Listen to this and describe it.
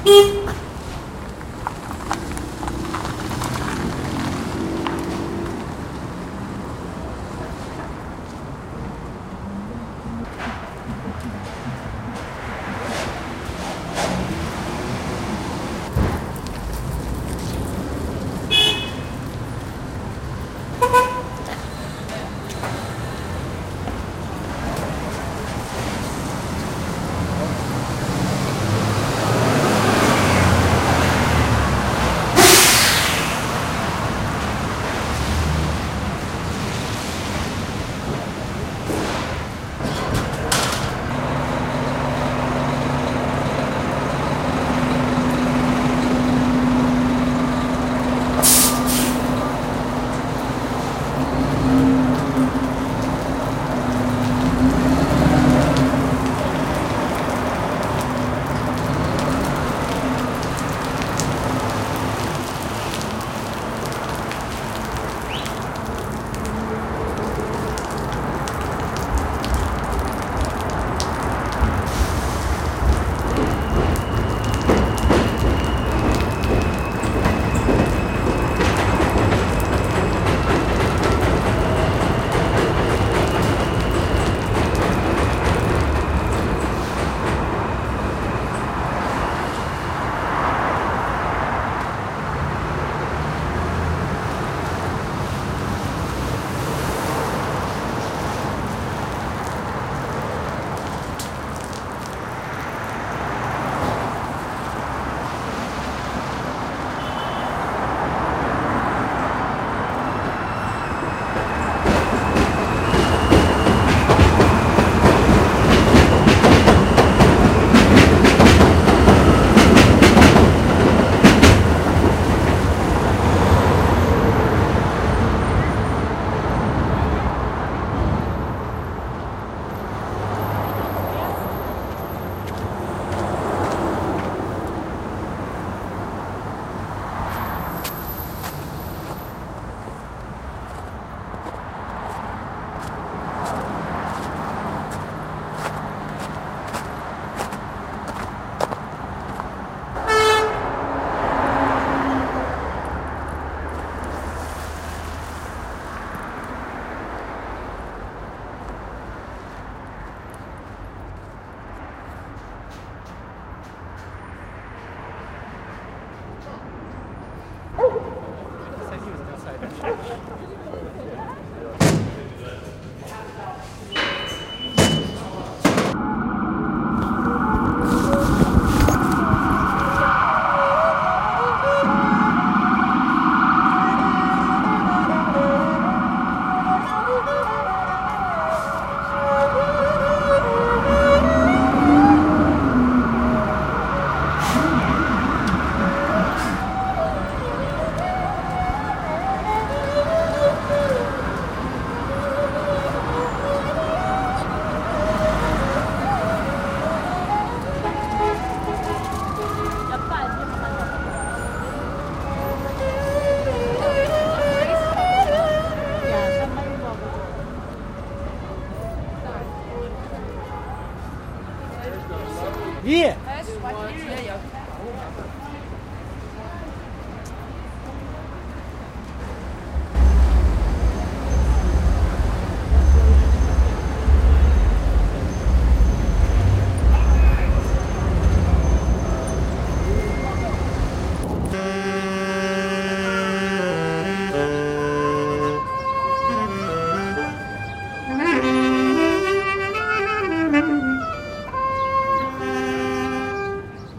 Zone B 150325 MARCY
mix street marcy station II
mix, nec, marcy, street